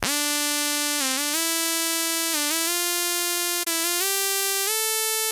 This is a lead line used quite often in rap/hip hop songs.
I used the patch "Hip Hop Lead" for the Subtractor in Propellerheads Reason 3.0.
90-bpm, hip-hop, rap, synthetiser